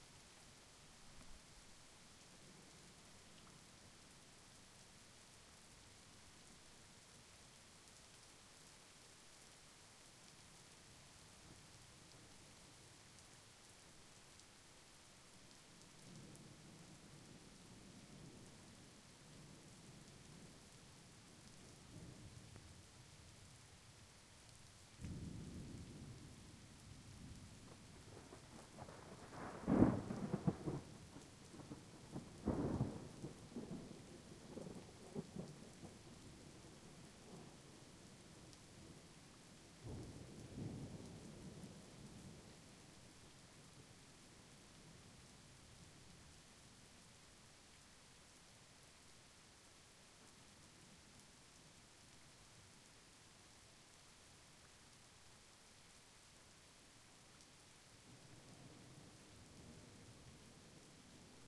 Thunderstorm recorded in Oklahoma 9/2011
weather, recording, thunder, field, thunderstorm, rain, storm